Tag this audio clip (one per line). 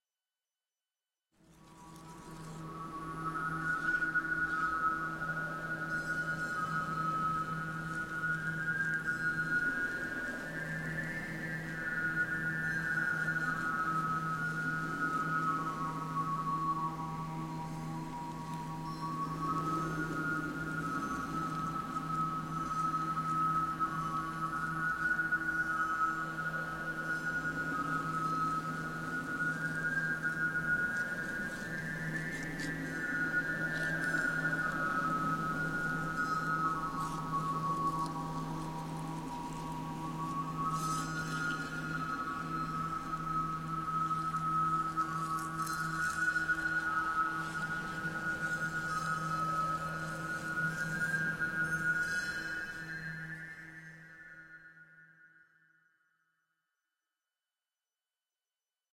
windy
mongolian
atmosphere
chimes